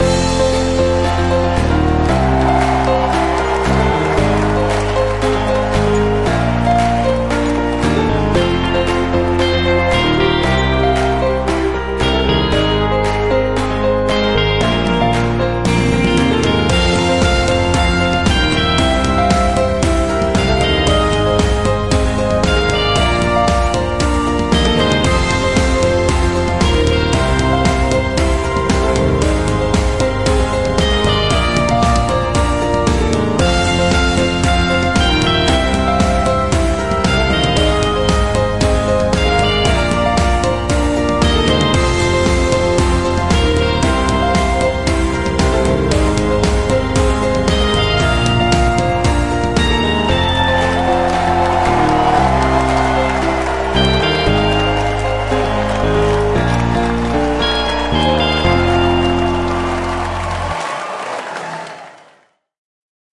The stadium is packed when "Play 4" finally takes the stage.
Everyone knows the lyrics to "Half Time Show" and Carlos even lets the crowd take over one verse.
This track uses this exciting crowd sample:
You can do whatever you want with this snippet.
Although I'm always interested in hearing new projects using this sample!
big-stadium-rock, clap, concert, crowd, drum, epic, guitar, music, piano, rock, short, show, stadium, strings